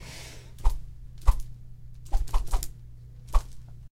audio de foley para animation lanzamiento
sfx, effect